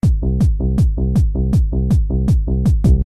Fusion drum loop 2
Nice drumloop done by me :)